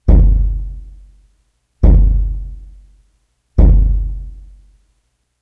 japanese drum02
ancient, start, Japan, drum, bass, Japanese